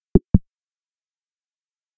Generic Advancing Confirmation Sound
UI sound effect. On an ongoing basis more will be added here
And I'll batch upload here every so often.
Generic, Advancing, Sound, Third-Octave, Confirmation